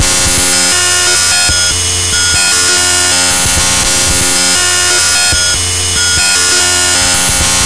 Loud, obnoxious, noise.
digital, distorted, industrial, loud, obnoxious, sound-effect